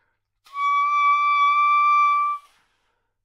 Part of the Good-sounds dataset of monophonic instrumental sounds.
instrument::sax_soprano
note::D
octave::6
midi note::74
good-sounds-id::5870
Intentionally played as an example of bad-richness bad-timbre